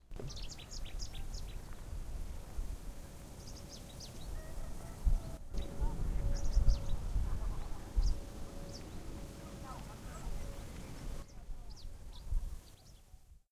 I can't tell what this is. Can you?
A bird singing. Recorded with a Zoom H1 recorder.